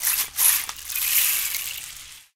perc-rain-shacker-short
Short rain-shacker sounds, recorded at audio technica 2035. The sound was little bit postprocessed.
percussion, percs